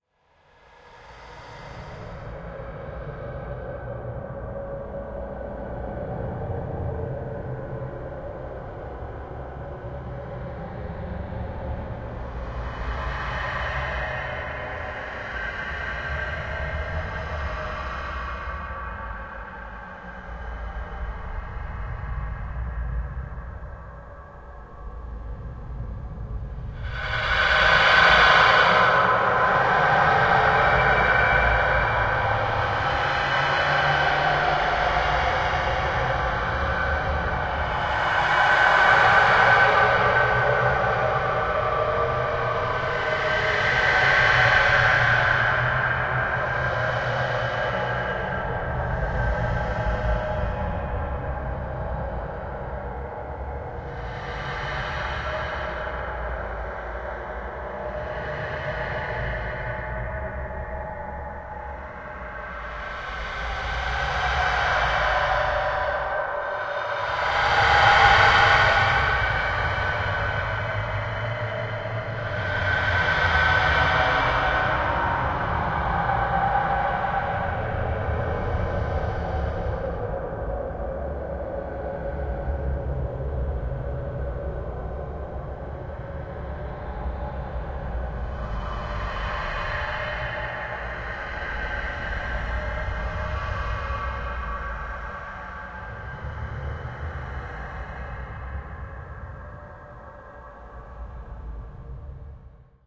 Eerie ambience
This started life as an acoustic guitar riff followed by some slide guitar and bass. The speed and pitch have been changed a couple of times, and the tempo has been messed with too - but none of these in an organised way. I then stretched the whole piece using the Paulstretch effect in Audacity (2.3.3) and selected this section of a much longer piece. A little bit of reverb has been added but not much.
It has quite an effective eerie atmosphere, with the slides adding a nice ghost-like effect. It has a more metallic sheen than I intended and sounds like something more from a videogame than something cinematic.
It would be interesting to see what you can make of it.
Ambience, Ambient, Atmosphere, Creepy, Eerie, Fantasy, Horror, Scary, Sound-Design, Soundscape, Spooky